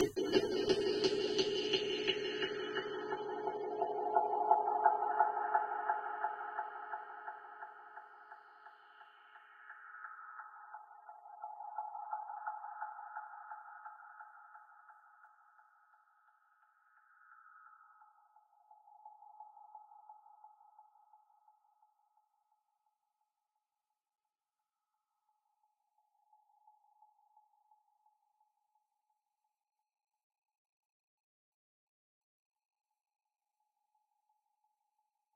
I converted it to stereo by copying the mono channel, pasting it into a stereo channel then inverting the right channel to create a more realistic stereo sound. I applied an Ohm Boys LFO delay at 130 bpm if you want to use this strike in your tunes at 130 bpm. I also added a long high end reverb for extra spacey feel. Sounds great at the end of a break when a tune breaks down into mellowness.